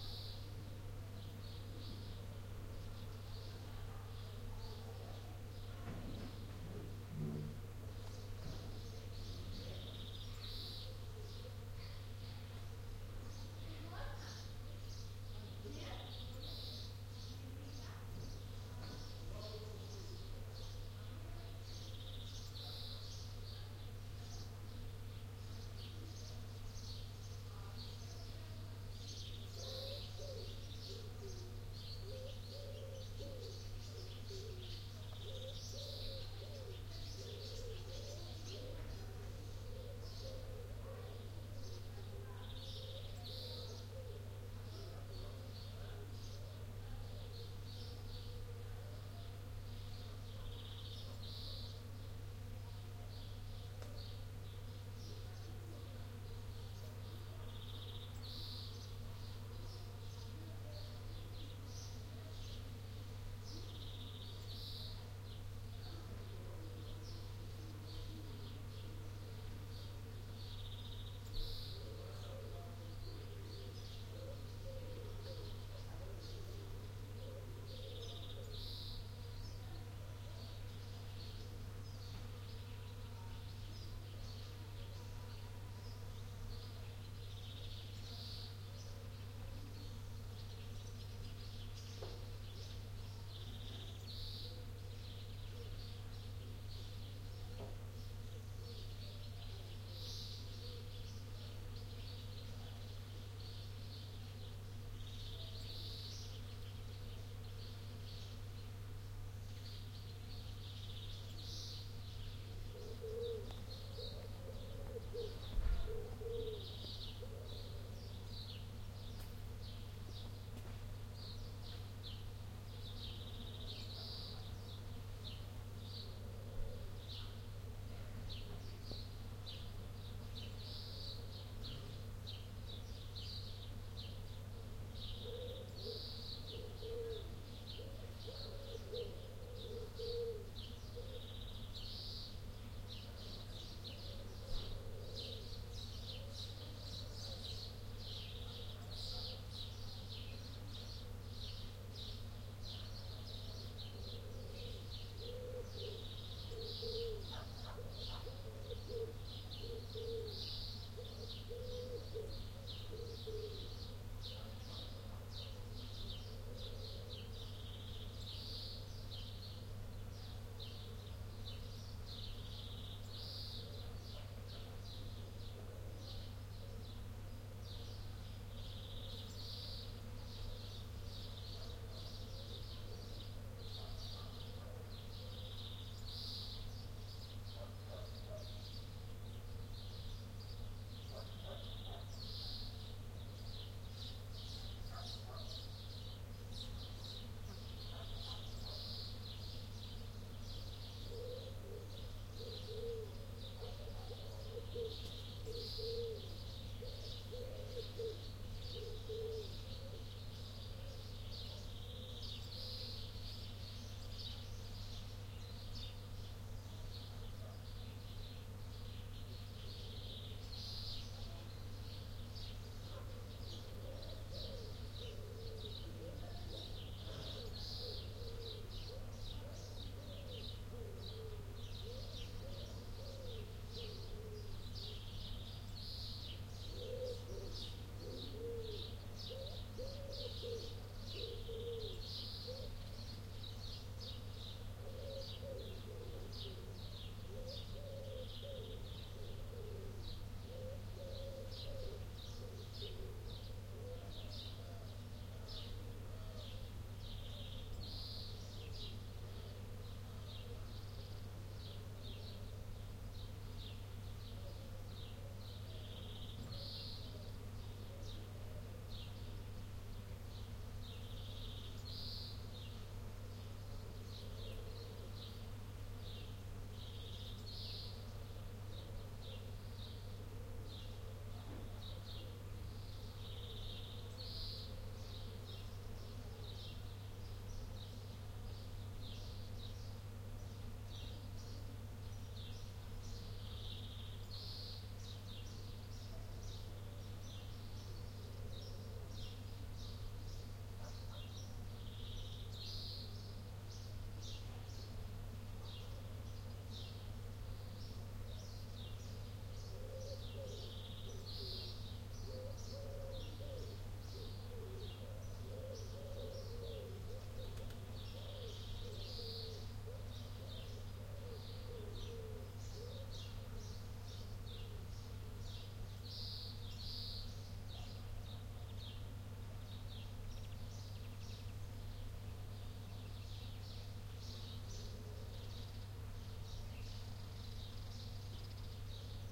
Countryside ambience Cyprus Miliou parking lot Ayii Anargyri spa resort OMNI mics
Recording made at the parking lot of beautiful spa resort in the mountains of Cyprus, Miliou area.
Bees, birds, people in a distance.
Recorded with roland R-26 built-in OMNI mics.